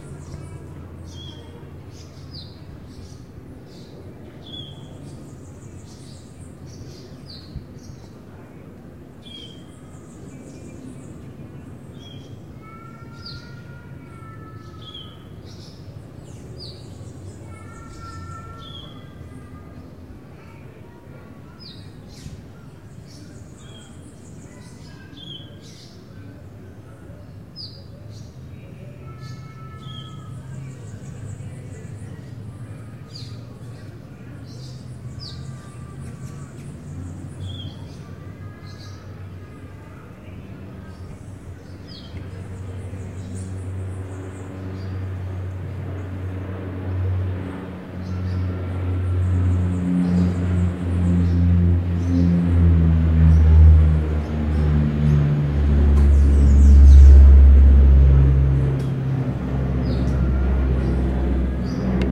Morning Ambience city
One minute of ambience of a quiet city, some cars, birds and people.
Ambience, Morning, quiet